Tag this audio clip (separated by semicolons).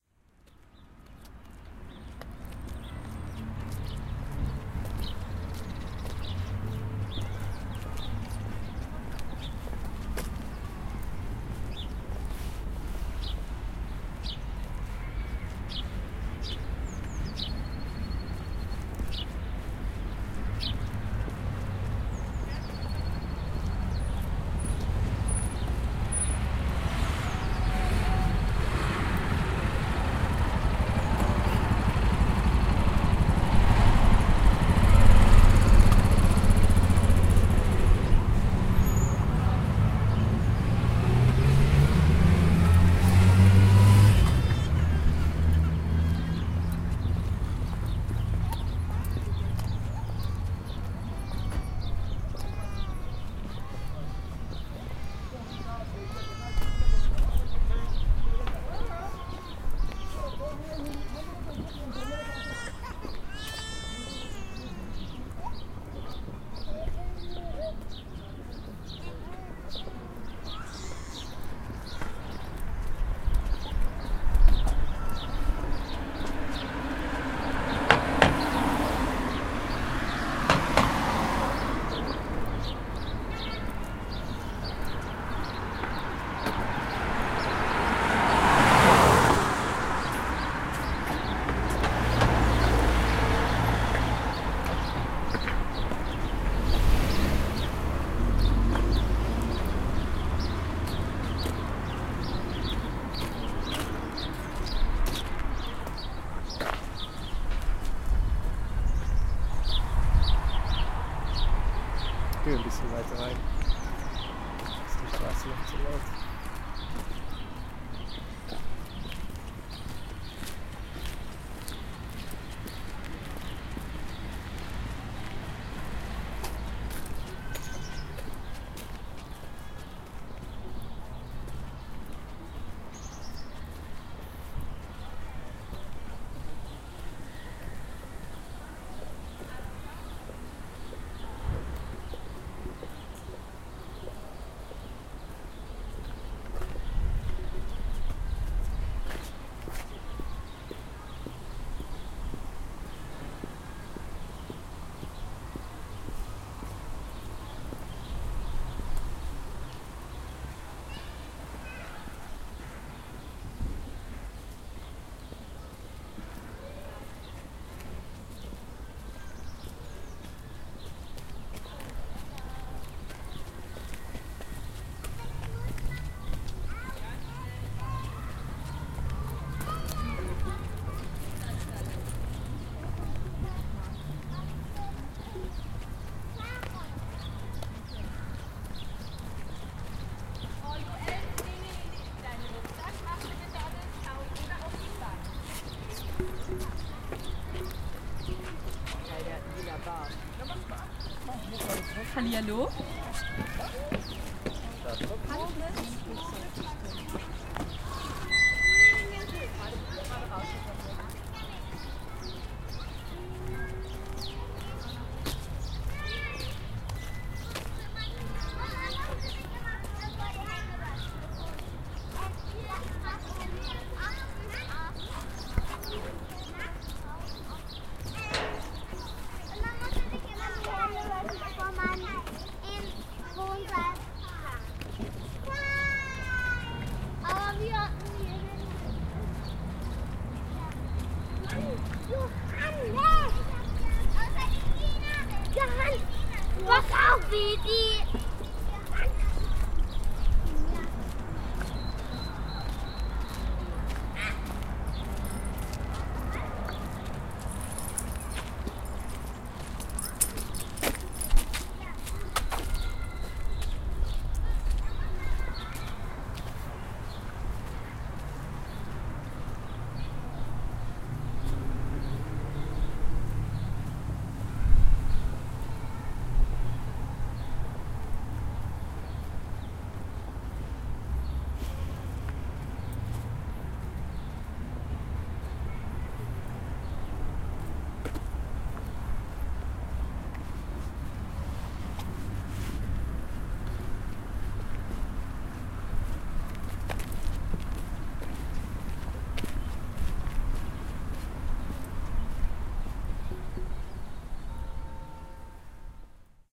Berlin; Park; Field-Recording